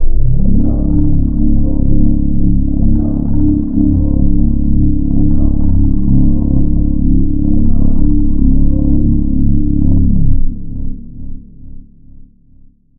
alien engine
scifi, engine, spaceship